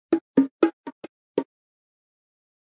JV bongo loops for ya 1!
Recorded with various dynamic mic (mostly 421 and sm58 with no head basket)
bongo, congatronics, Unorthodox, loops, samples, tribal